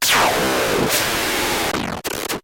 an angry synthesized dog and cat going at it.
TwEak the Mods